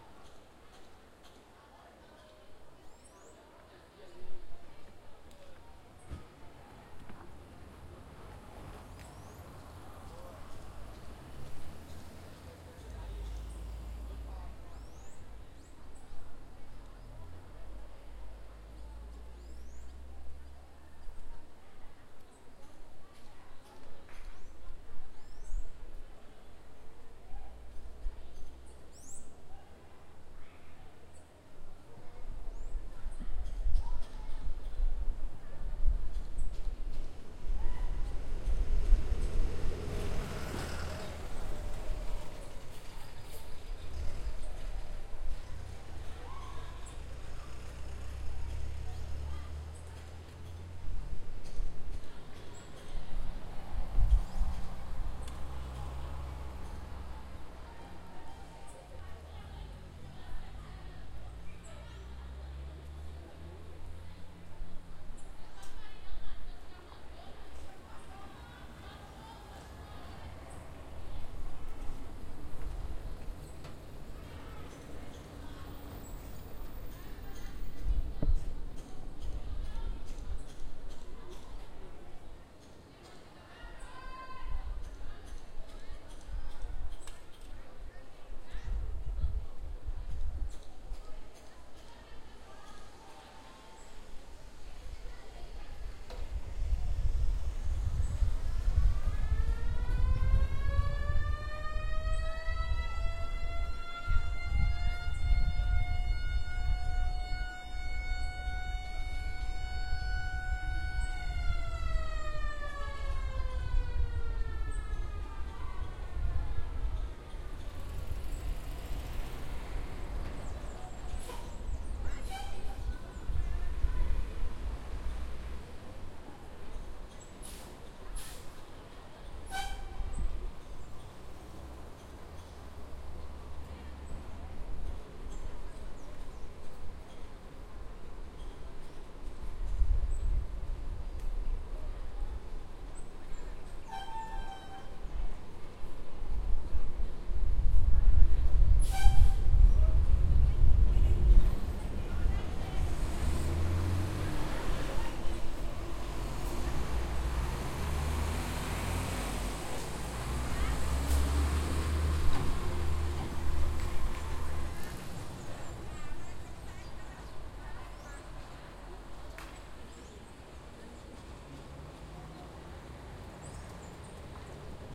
Aula Vaga - Empty Class
Na frente do Colégio Estadual de Cachoeira, alguns alunos. De um lado da rua, pouco trânsito. Do outro lado da rua, uma construção. Áudio gravado debaixo de uma grande árvore. O sol estava muito forte.
Gravado por Cláudia Ferrari
Equipamento: Tascam DR40.
Data: 20/março/2015.
Horário: 9h50.
Some students in front of Colégio Estadual de Cachoeira. Little traffic. Across the street, men at work. Audio recorded under a large tree. The sun was very strong.
Recorded by Claudia Ferrari
Equipment: Tascam DR40.
Date: March/20/ 2015.
Time: 9:50 a.m.
birds, sirene, passaros, carros, voices